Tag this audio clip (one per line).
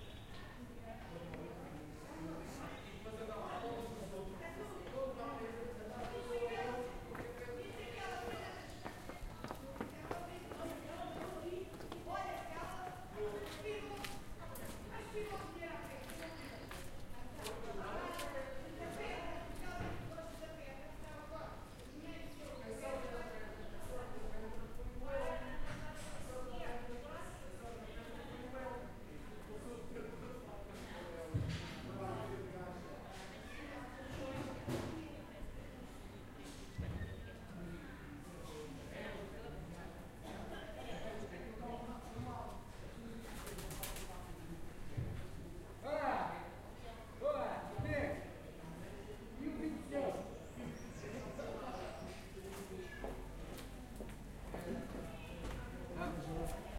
portuguese
field-recording
voices
lisbon
streets
city
soundscape